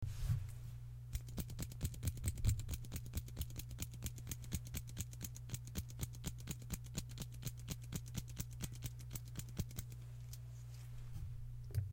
Pen Clicking
Used a mechanical pencil to make the clicking noise
clicking, pencil